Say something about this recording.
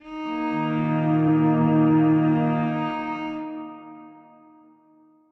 cello mixdown 2
Variations on Thirsk's "Cello Loop" , with beginning and end, with reverb